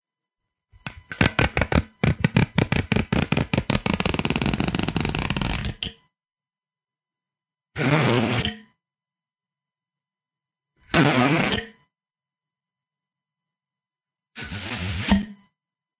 Hollow tube zipper sound
zipper, zip, plastic, along, nails, zipping, rattle, ridges, unzip, sliding